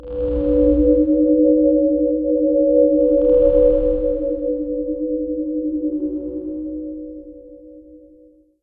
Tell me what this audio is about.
Another numbers station remix. Spooky little mini-sphere.